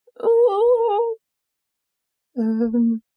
Nervous wail
A nervous, wailing cry when someone was stuck on how to do some work.
nervous, overwhelmed, scared, shiver, stressed, wail